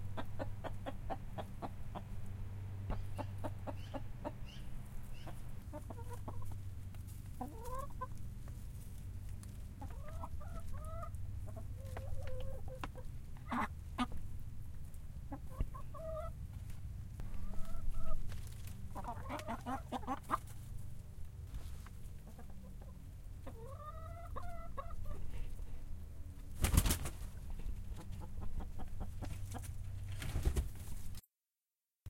Four laying hens in my backyard coop. Recorded with a Zoom H4n. (Dora, Regina, Georgia and Freida.)
chickens, coop